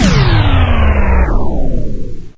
A machine loop winding down. I intended this for a chaingun - the sound winding down as the gun's motor is powered down.
loop, machine, wind-down